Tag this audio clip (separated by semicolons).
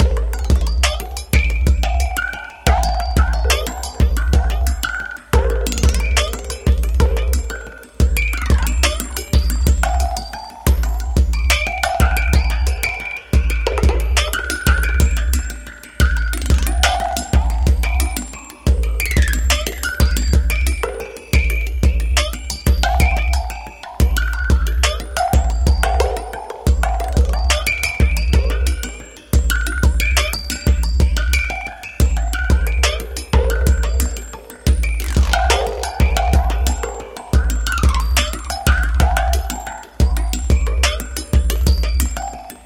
beat; drum; electro; loop